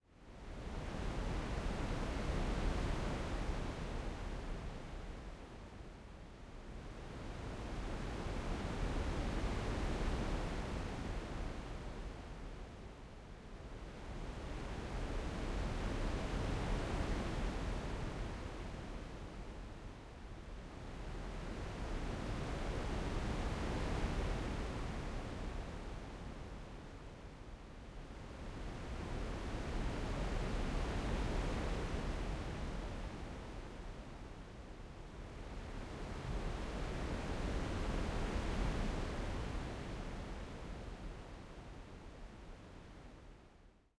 Ocean waves 2
A sample that sounds like waves crashing on a beach. I created this using FabFilter Twin 2 after a session exploring the different XLFO's and filters of this amazing Synth.
Crashing, Shoreline, Sounds, Storm, Waves